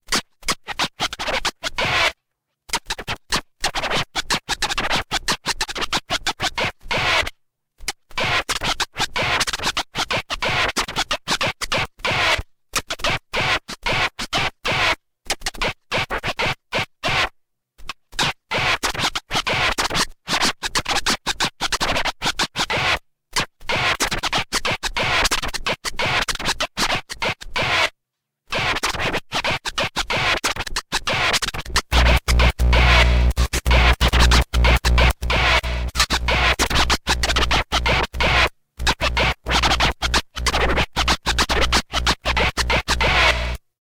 93bpm jackgarrison scratch dubs1
Here is a scratch track for taking apart and adding to an audio composition. The actual sample is 'HUAH!' It sounds like a crash sample.